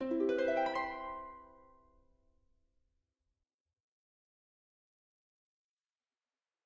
Glissando on a harp from low to high for 6 seconds. To be used in a quiz if, for example, a correct answer has been given to a question. But the sound can also be used for other parts: as a result of a wizard, witch or fairy. Good luck with it.